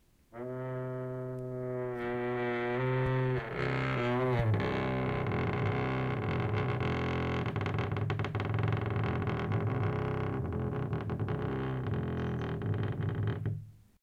creaky door 1
A creaking wooden door (with metal hinges.) This sound is a long drawn-out very low-pitched creak. Recorded in stereo using a H2n.